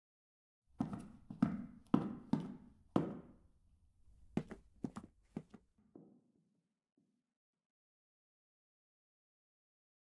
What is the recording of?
Footsteps leaving room - tiles
Footsteps on tiles. Subject leaves room.
footsteps, tiles